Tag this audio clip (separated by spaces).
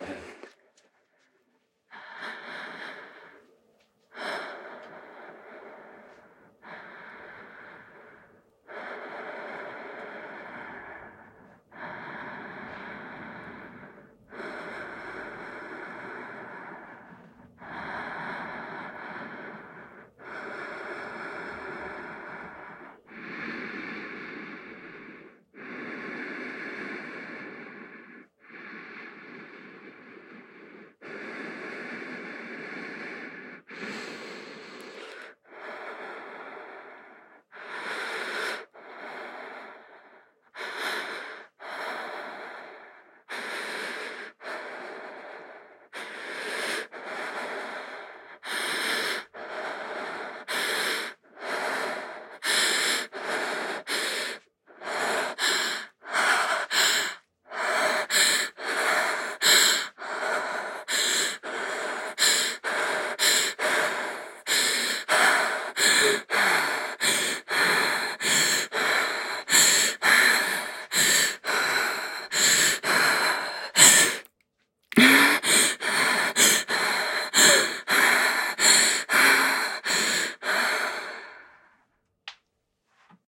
Breath Breathing Female